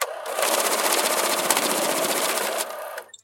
banknote-counter
Clear sound of machine counting banknotes. No sound of engine, no external noise.
banknote, counter, money